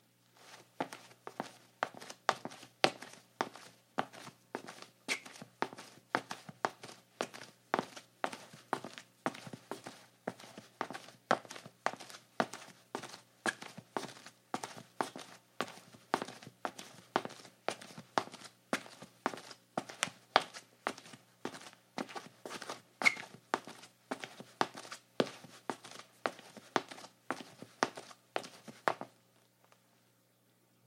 01-21 Footsteps, Tile, Slippers, Medium Pace
Slippers on tile, medium pace
footstep, male, slippers, tile, walk